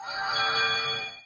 Fantasy ui Button 3
Fantasy_ui_Button ui chimes crystal chime bell fairy sparkle jingle magic ethereal tinkle spell airy sparkly
spell, chime, Button, crystal, ting, ethereal, sparkly, jingle, airy, bell, sparkle, magic, tinkle, ui, fairy, Fantasy, chimes